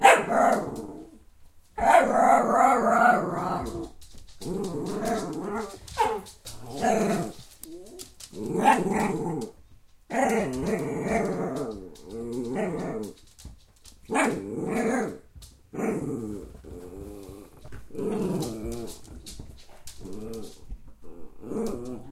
Dachshund Play Growling
My male dachshund playfully growling as he is playing with my wife. Wooden floor has clicking sounds of dog paws/nails.
dachshund, dachsie, dog, doggie, doxie, growling, play, playful, playing, pup, small, tug, war, weenie, weiner